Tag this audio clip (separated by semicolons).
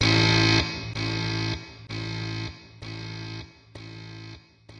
drums; filter; free; guitar; loops; sounds